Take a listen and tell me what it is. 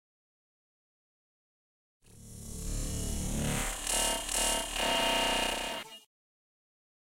Heat Long
This is a long version. Transformers type of sound FX
electric, Transformers